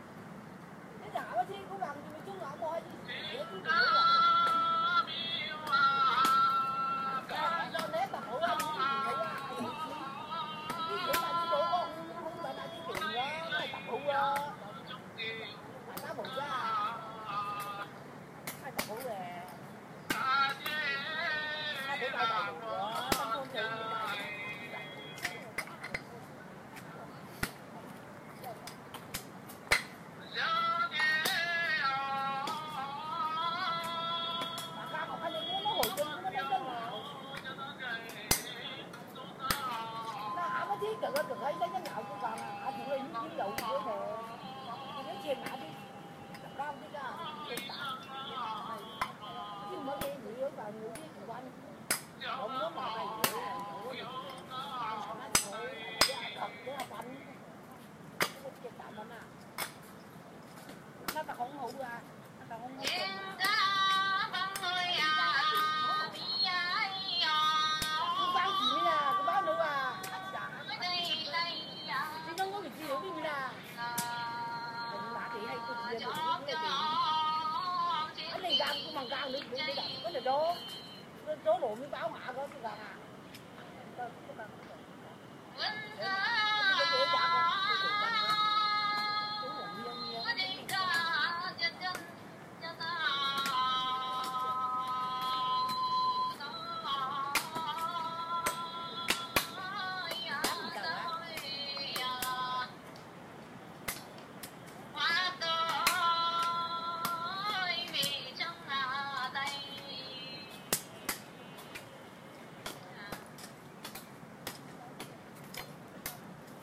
Garden Workers With Radio - Nanning China 01
A recording of a group of female garden workers early one morning in Nanning China. One of the ladies had a small, portable radio playing while they dug away at the earth with their gardening tools. Recorded on my iPhone4.